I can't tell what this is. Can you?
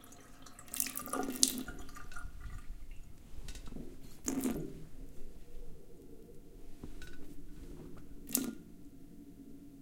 Tap Water Multiple
Tap, water, sink sounds. Recorded in stereo (XY) with Rode NT4 in Zoom H4 handy recorder.
drain, drip, dripping, drips, dropping, drops, sink, stream, tap, tapping, water, watery